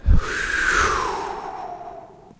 Woosh short
short woosh sound
beatbox, bfj2, dare-19, creative, hit